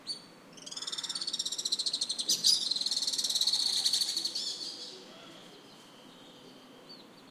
20160818 alpine.swift.02
Alpine swift screeching. Recorded in downtown Saluzzo (Piamonte, N Italy), using PCM-M10 recorder with internal mics
field-recording, nature, birds, mountains, Tachymarptis-melba, screeching, Apus-melba, Alpine-swift